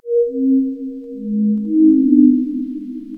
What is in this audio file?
A kind-of jazzy little thingy...